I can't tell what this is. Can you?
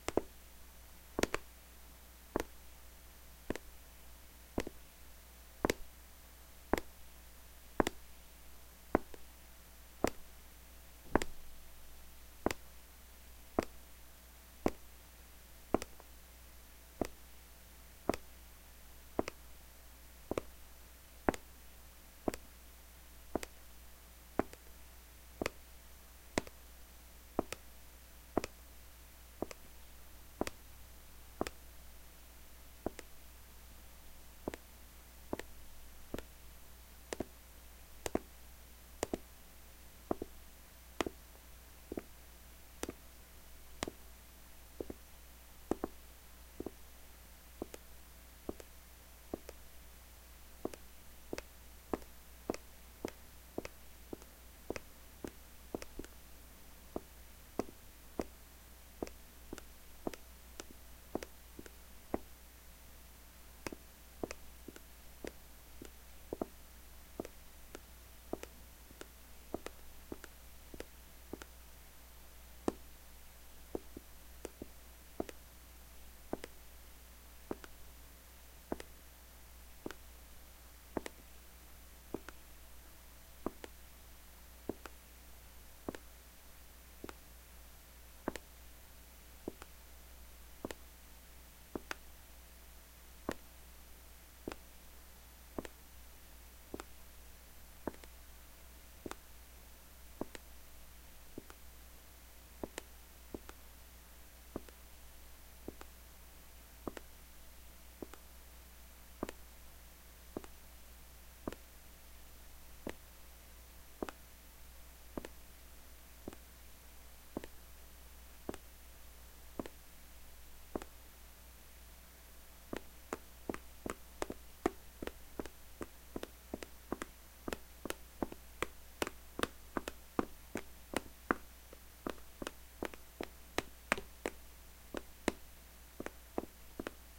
footsteps-mockup02
A "mockup" of footstep sounds, made by "drumming" with my fingers and nails on the plastic case of my Zoom H4n portable recorder. Despite using the case of a recorder, it was not recorded with the Zoom, but with one of those sheap computer headset microphones. Edited in Audacity to remove the undesired parts. It sounds a bit like soft footsteps on a tiled floor.
floor, footstep, footsteps, mockup, simulated, simulation, soft, step, stepping, tiled, walk, walking